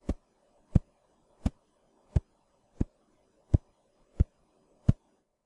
Recorded by mouth